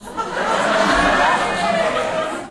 laugh and cheer
A mix of laughter and cheering as an audience member gives the deets. Part of a series of brief crowd noises recorded during a "dating show" at Nottingham Trent university student's union, 15 Feb 2022. Pixel 6 internal mics > Adobe Audition (high frequency boost)
laughter, crowd, people, laughing, cheer, audience, cheering, laugh